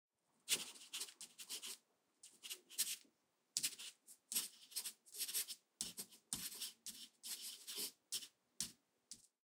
Pencil Writing 2 4
Design, Desk, Foley, Graffite, Hit, Hitting, Real, Recording, Sound, Table, Writing